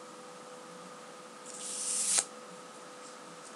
sound6-paper
This sound was created by lugging a paper on a very smooth surface.
effect, lug, paper, smooth, sound, strange, surface